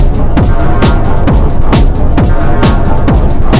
oh,its a loop.
made with reaktor ensemble ttool01.
greetings from berlin city!